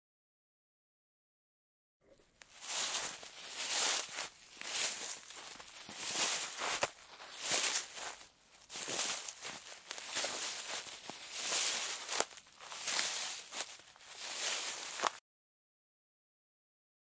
Rustle walking by the leaves.
footsteps, forest, leaves, Panska, rustle, steps, walk